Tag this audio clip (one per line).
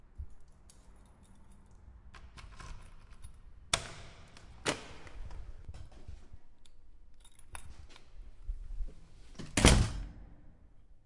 closing
door
keys
opening